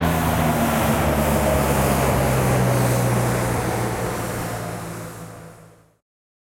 Sweep Down
Sweep sound cut processed in sampler using pitch envelope
source file: